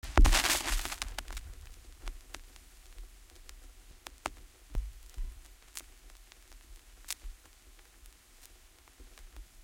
Vinyl Lead-In Groove 04
Lead in groove of a 7" single @ 45 RPM.
Recording Chain:
Pro-Ject Primary turntable with an Ortofon OM 5E cartridge
→ Onkyo stereo amplifier
→ Behringer UCA202 audio interface
→ Laptop using Audacity
Notched out some motor noise and selectively eliminated or lessened some other noises for aesthetic reasons.
lead-in-groove, analogue, retro, turntable, stylus, vintage, single, surface-noise, vinyl, crackle, record-player, record, 7, noise, hiss, 45RPM